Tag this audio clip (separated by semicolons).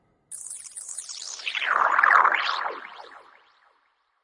Fx; broadcasting; Sound